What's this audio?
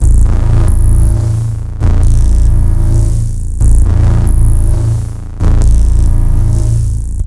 Bass sci-fi sound, spaceship.
abstract, Bass, electric, future, fx, sci-fi, sfx, sound, sounddesign, soundeffect, spaceship